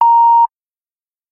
beep dennis 1 long
This beep is comming out from substractor on propellerhead reason.
radio, news, long, beep